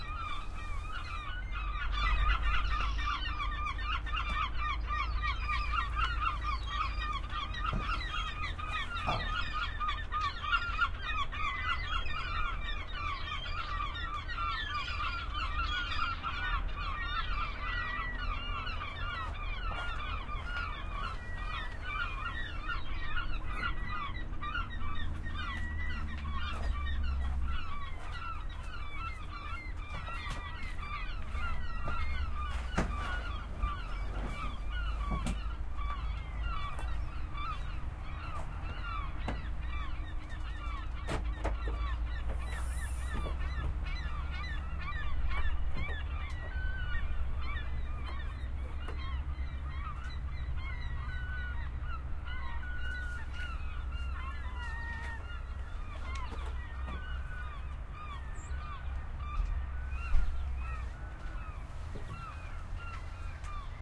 Seagull screeching, somebody moves around. Shure WL1823 into Fel preamp and Edirol R09 recorder
beach, field-recording, ambiance, nature, seagulls